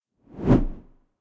VS Short Whoosh 1
Short Transition Whoosh. Made in Ableton Live 10, sampler with doppler effect.